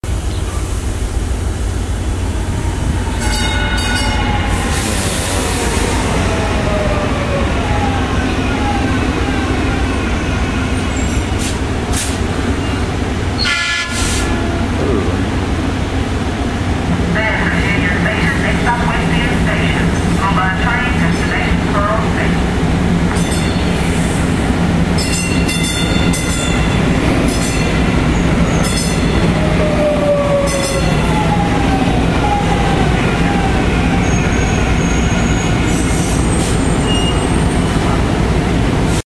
4-15-11 UnionStationOppositeTracks (Dobberfuhl Bridgewater)
Part of the Dallas Toulon Soundscape Exchange Project
April 15, 2011 - Union Station in Dallas - 10:17pm
Relatively orderly sound with rugged texture. High pitch in some instances, but generally lower frequencies. Train arriving and departing causes fluctuation in intensities.
Temporal Density: 5
Polyphony: 3
Loudness: 5
Chaos/Order: 7
by Brad Dobberfuhl and Brandon Bridgewater
arrival, dart, departure, railroad, tracks, train, union-station